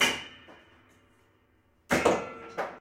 wood thrown at piano